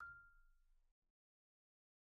Sample Information:
Instrument: Marimba
Technique: Hit (Standard Mallets)
Dynamic: mf
Note: F6 (MIDI Note 89)
RR Nr.: 1
Mic Pos.: Main/Mids
Sampled hit of a marimba in a concert hall, using a stereo pair of Rode NT1-A's used as mid mics.